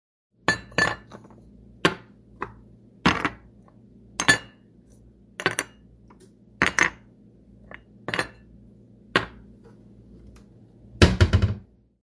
glass cups MUS152
putting cups in cabinet
insert, cups, clink